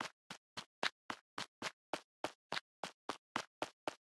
footsteps (loop)
crunchy, foley, grass, step, walking